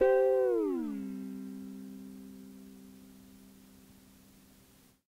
Tape Slide Guitar 11
Lo-fi tape samples at your disposal.